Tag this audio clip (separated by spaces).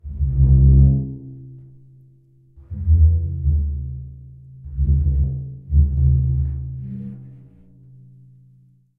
framedrum squeaking